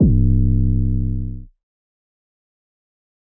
DSP Boss 01
So with that being said I'm going to be periodically adding sounds to my "Dream Sample Pack" so you can all hear the sounds I've been creating under my new nickname "Dream", thank you all for the downloads, its awesome to see how terrible my sound quality was and how much I've improved from that, enjoy these awesome synth sounds I've engineered, cheers. -Dream
808, Deep, Electronic, EQ, Equalizing, FM, Frequency-Modulation, Hip-Hop, Layering, Low-Frequency, Sub, Sub-Bass, Synthesizer